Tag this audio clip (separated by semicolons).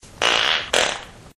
aliens; car; explosion; fart; flatulation; flatulence; frogs; gas; noise; poot; race; space